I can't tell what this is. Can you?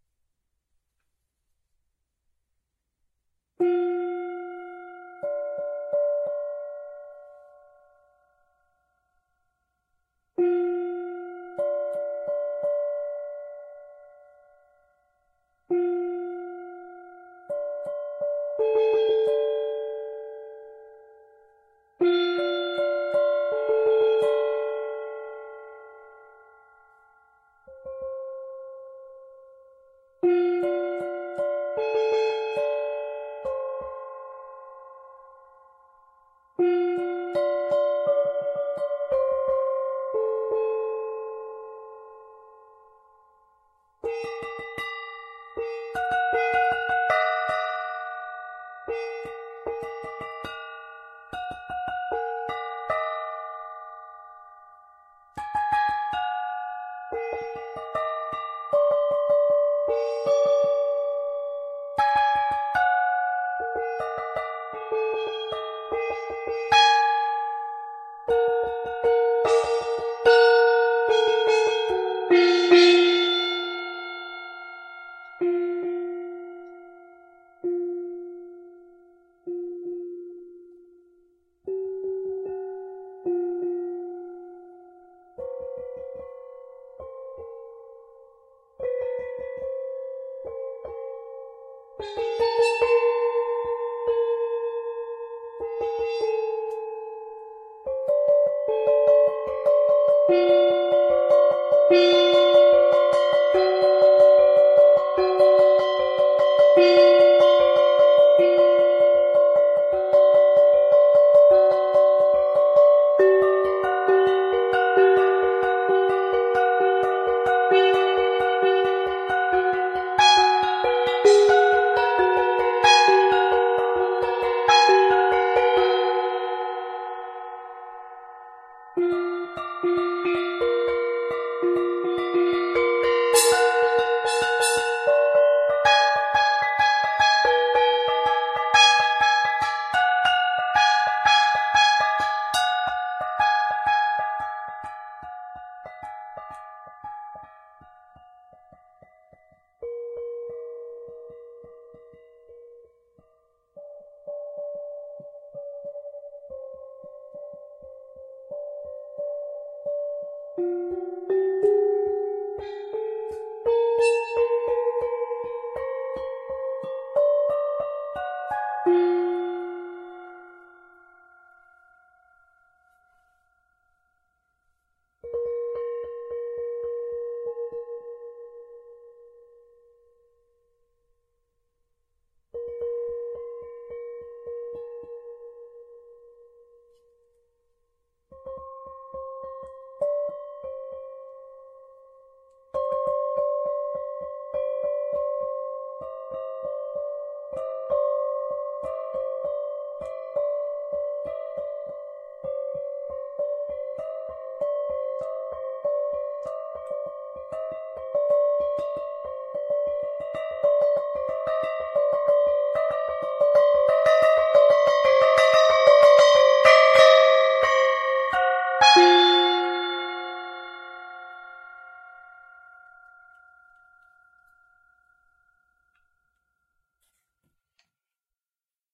I found that if you turn thado singing bowls upside down and strike them in the center, they sound rather like the sound a gamelan makes. So I turned a whole bunch upside down and played around for a while.